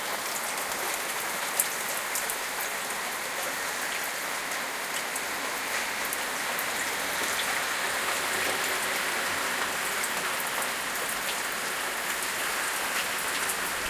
The squeak sounds you hear are not in the original sound once downloaded. They are a strange artifact on this websites playback widget I think.
13 seconds of rain i quickly recorded today at my back door. recorded with a Zoom H5.